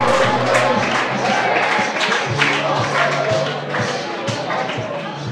TRATADA190127 0786 aplausos torcida
Stadium Field Recording
Field, Recording, Stadium